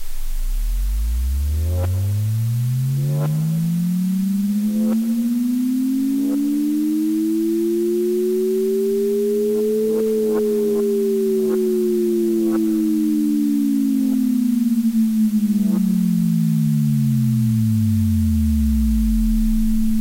This sound is made with Csound. I used 5 instruments on it:
- White noise that change from L channel to R channel
- 2 sinewaves that make a glissando
- Another sinewave that does a crescendo
Crescendo, csound, Dsikin, Glissando, Panoramizacion, Whitenoise